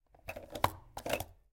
Sound of pressing and relasing self-inking stamp recorded using stereo mid-side technique on Zoom H4n and external DPA 4006 microphone
aproved, bank, cancelled, certified, click, completed, down, letter, office, paper, post, press, relase, self-inking, stamp, stamping, stationary, top-secret